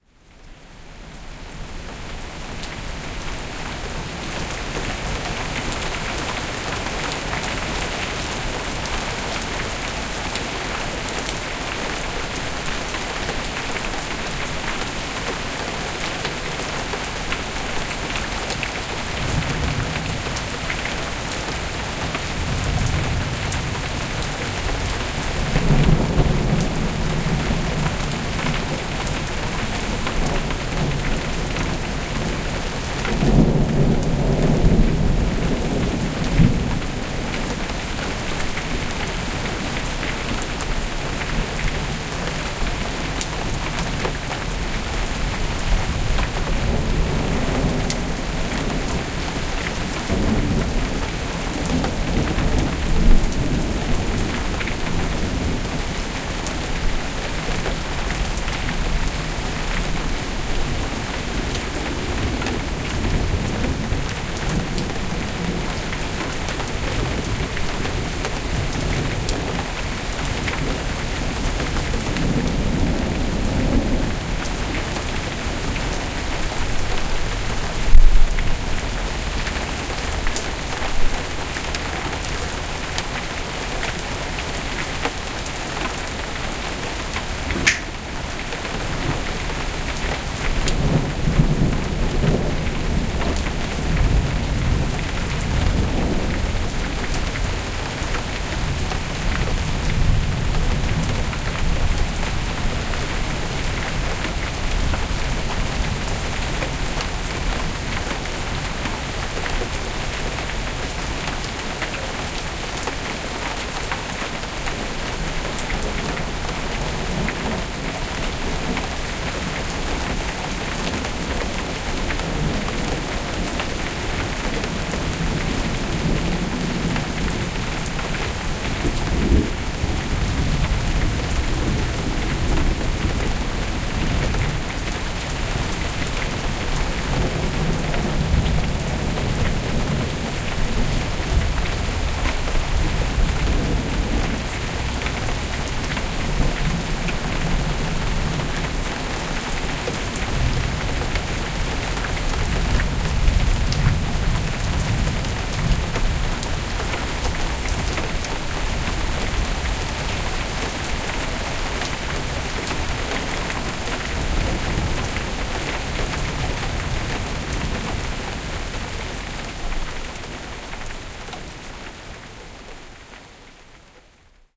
This was a thunderstorm the weather-folks warned us about, don't go out if you don't need to . Huge hailstones etc.etc. There was some damage but it was not as 'spectacular' as predicted. PART1
Heavy Rain, high frequency of thunder wind.